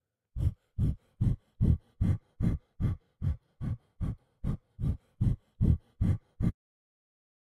heavy breathing 1
heavy breathing effect
breathing, fx, heavy